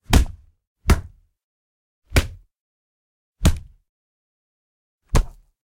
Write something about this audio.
5 Bat Hits
5 foley hits, wooden bat-on-zombie
bat, dead-season, foley, hit, meat, percussion